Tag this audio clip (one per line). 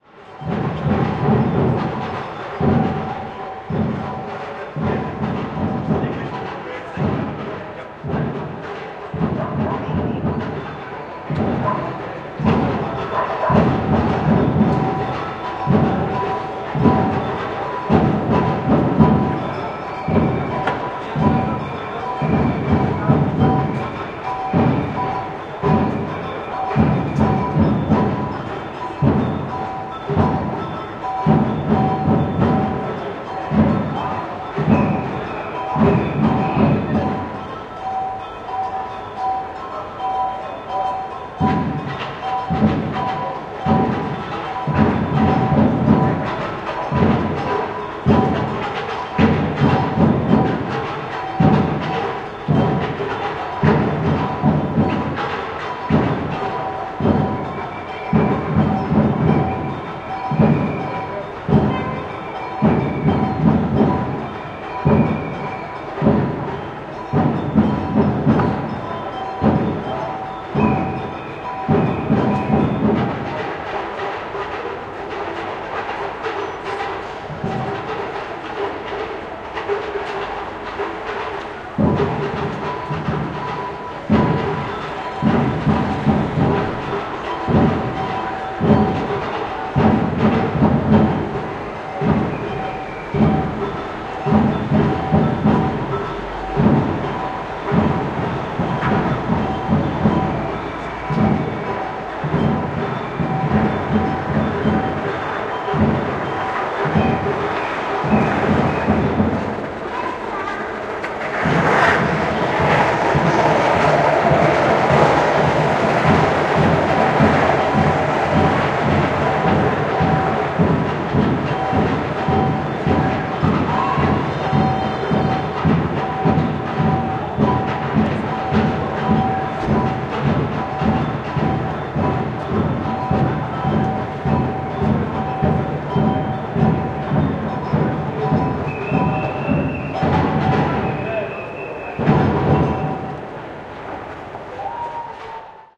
drum
drumming
fieldrecording
manifa
manifestation
march
poland
pozna
street